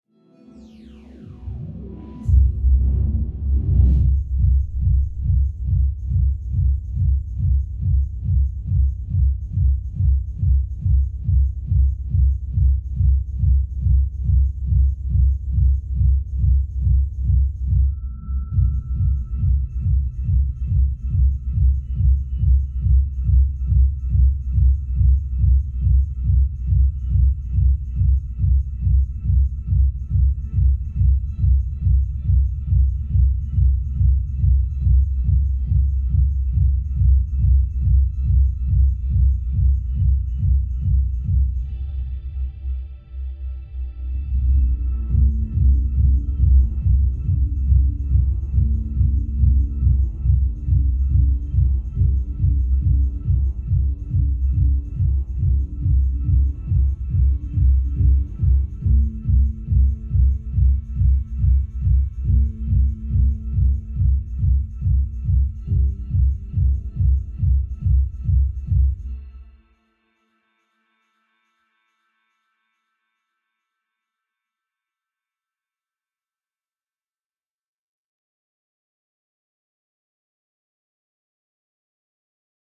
Freak Ambience
This use to be a song I was trying to write... I failed miserably at the song but played around with some ambiance I created by accident and turned it into a piece or sound design instead. Good for a scene change in a horror film I suppose.